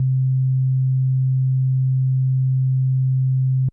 TX81z wave1
A raw single oscillator tone from a Yamaha TX81z. Basically a sine wave.